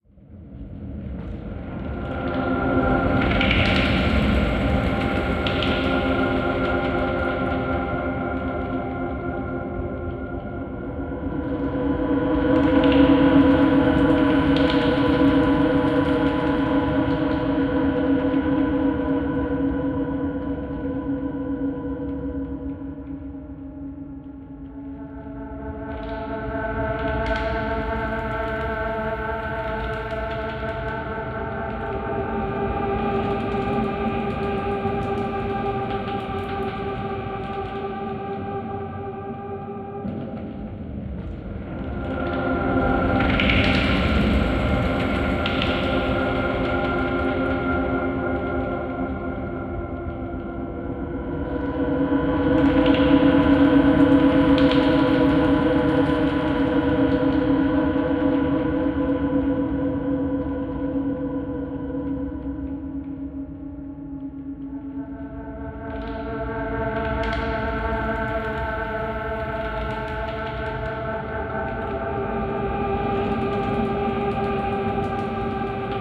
Abadoned Nuclear Factory